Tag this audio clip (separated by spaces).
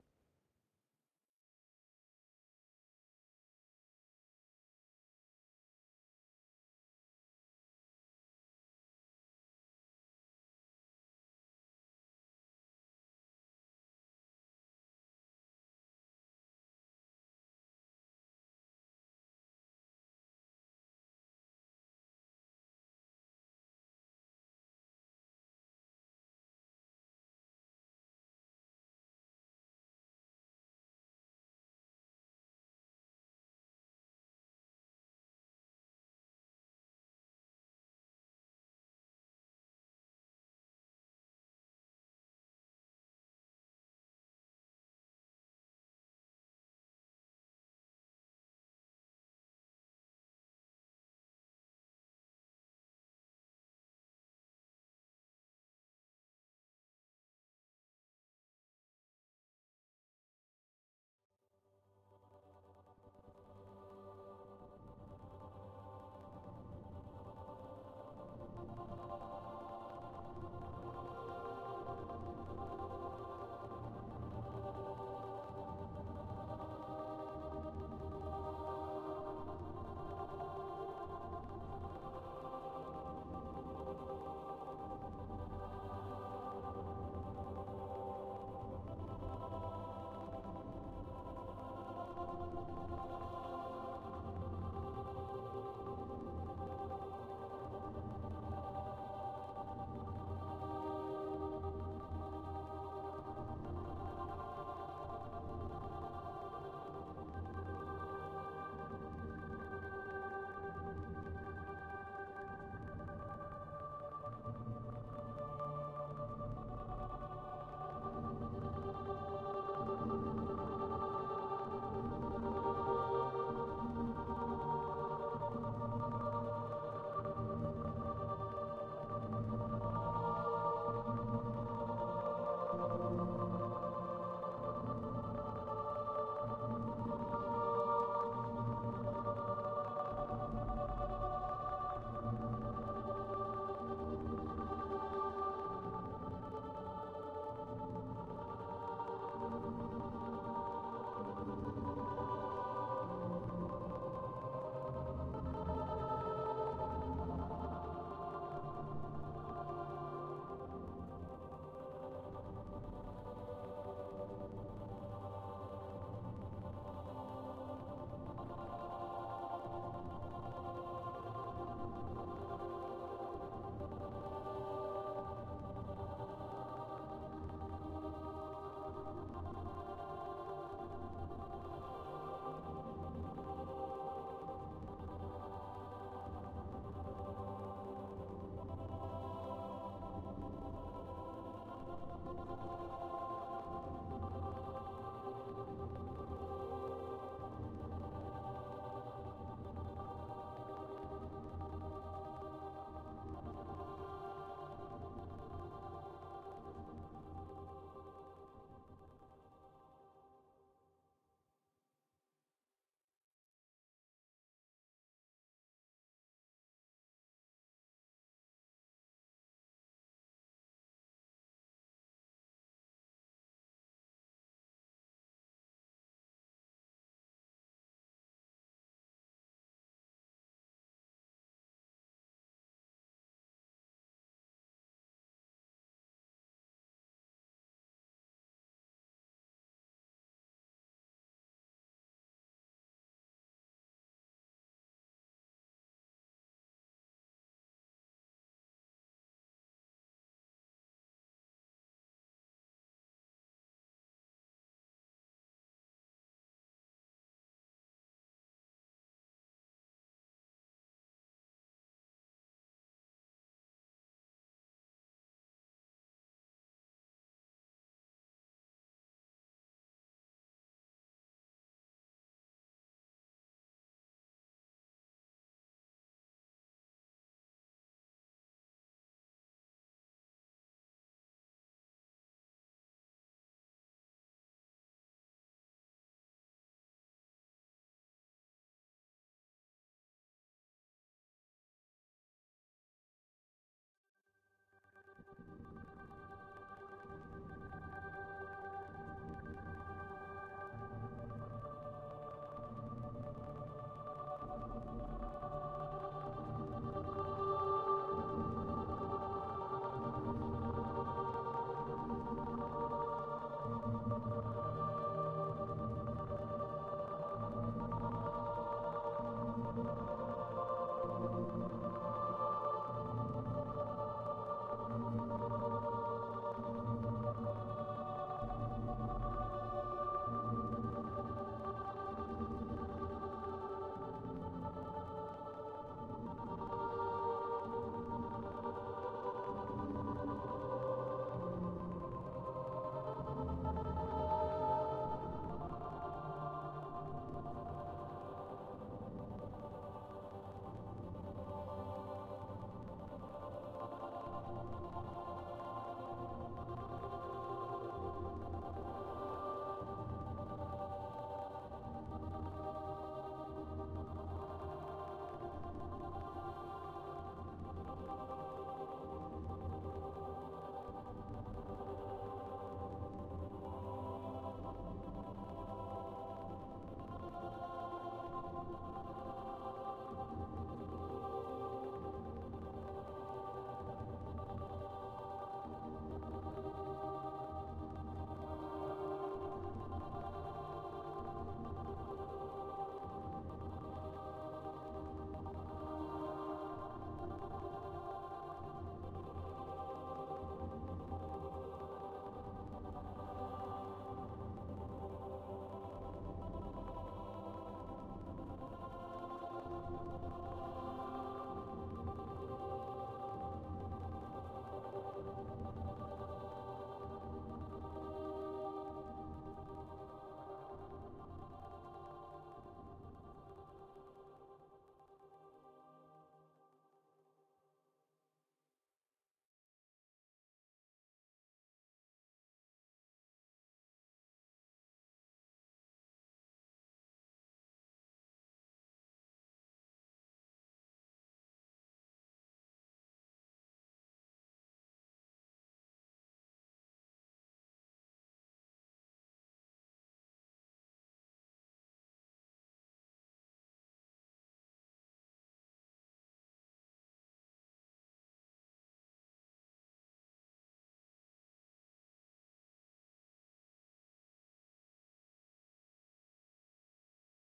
fight-the-powar idm secundo ftp idmized 302129 glitch tempore